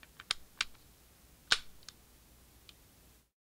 Tape Misc 9
Lo-fi tape samples at your disposal.
lo-fi, misc, collab-2, mojomills, Jordan-Mills, lofi